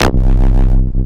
Analog Drum Kit made with a DSI evolver.